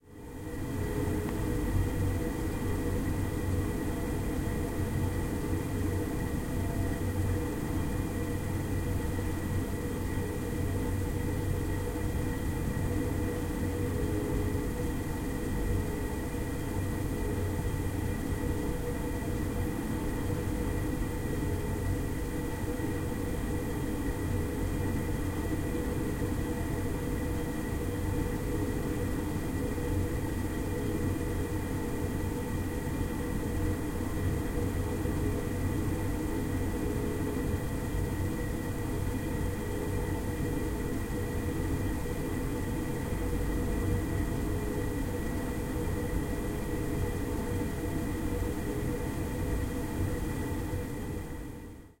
Recorded next to an old gas oven in a living room. You hear the gas and the flame.